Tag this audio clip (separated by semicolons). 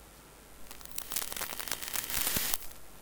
Sparks; buzz; cable; electric; electricity; electro; electronic; fault; faulty; glitch; hiss; lo-fi; machine; noise; sparking; static; strange; zap